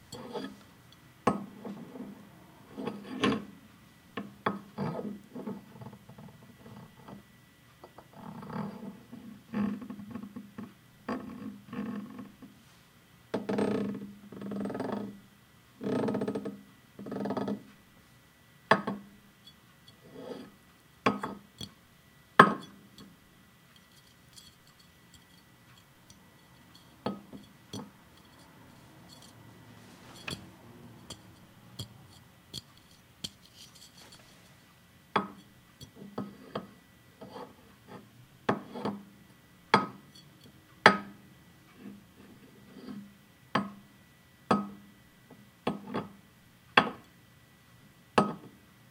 Beer Bottle, Handling
Handling sounds of a beer bottle
foley, hold, grab, beer, handling, glass, bottle